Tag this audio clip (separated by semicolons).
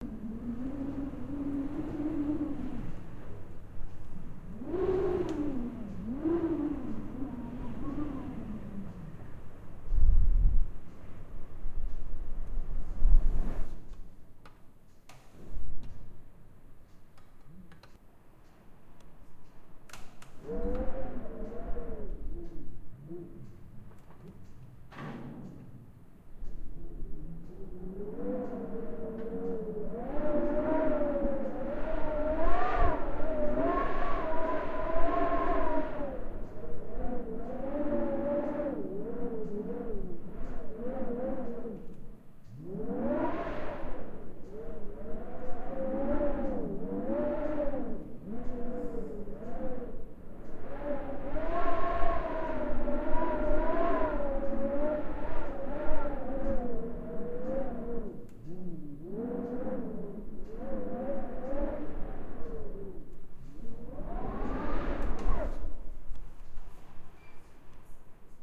Heulen; howling; Wind